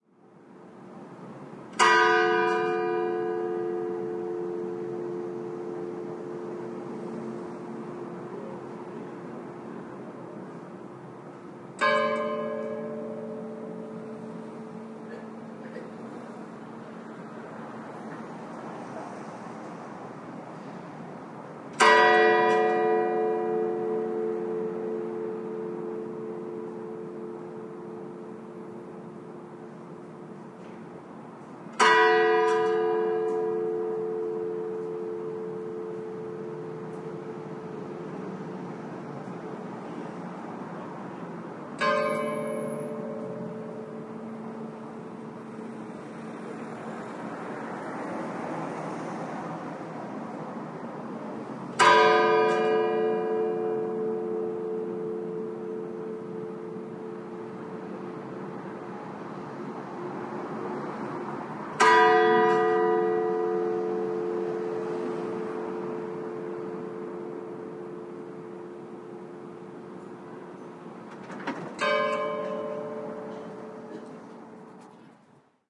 20071102.pealing.bell
bells pealing to announce a funeral at Parroquia de la Magdalena, Seville, Spain. Edirol R09 internal mics
ambiance, autumn, bell, church, city, field-recording, funeral-rite